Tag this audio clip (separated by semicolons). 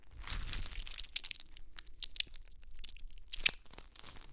blood; brain; flesh; gore; gross; horror; horror-effects; horror-fx; mush; slime; squelch; squish; zombie